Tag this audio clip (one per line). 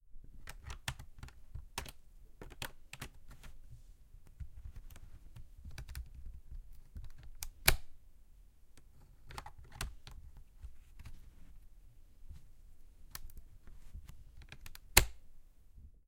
computer
usb
mechanical